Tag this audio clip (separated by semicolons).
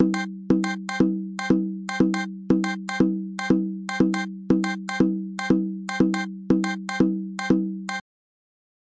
effects; media; Recording